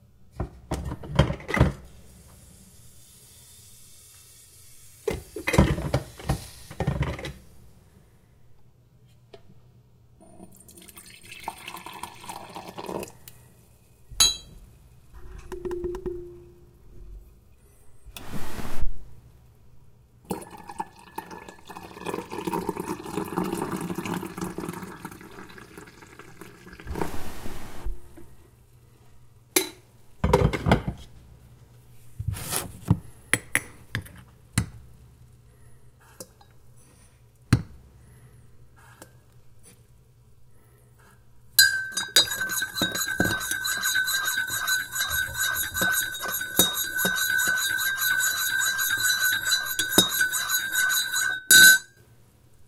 Kitchen - coffee or tea being poured into mug and stirred
A beverage such as coffee or tea being poured into a mug and stirred with a spoon.
cup, foley, pour, tea